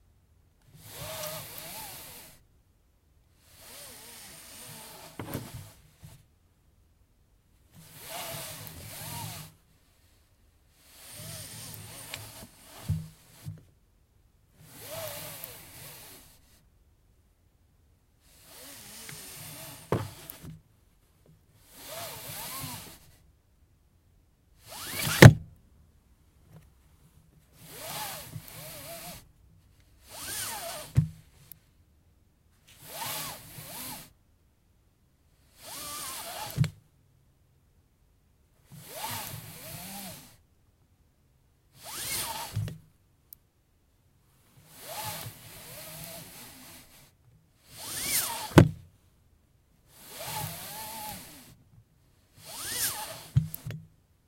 Miscjdr Car Seatbelt Pull out and recoil
Honda Fit Seatbelt Movement
Seatbelt, Safety, Buckle, Automobile